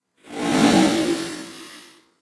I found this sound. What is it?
Transformer Buzzing Noise
Here is a pretty awesome sound I created by adding alittle things here and there to a sound made by "f4ngy", it reminds me of the movie transformers a bit.
Here's a link to the original sound that made this all possible: